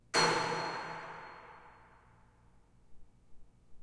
Here's one from a series of 'clang' sounds, great for impact moments in trailers & commercials, or to layer up with other sounds. They are somewhat high-pitch, so they might mix well with low frequency drums and impact sounds.
Recorded with Tascam DR-40 built-in-mics, by hitting a railing with a pipe in a stairwell and adding a little bit more reverb in DAW.